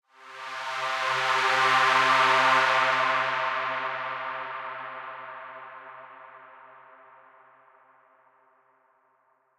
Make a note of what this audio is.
distant rise 3
a dark and distorted riser pad sample